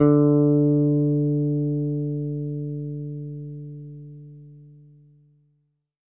Second octave note.